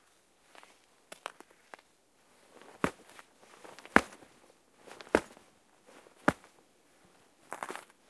I recorded sound of trying to break an ice on the pond in the forest.